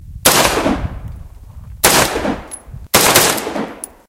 this sound is recorded at the gun itself